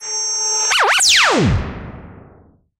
Laser04rev
Laser sound. Made on an Alesis Micron.
alesis, laser, lasergun, micron, sci-fi, synthesizer, zap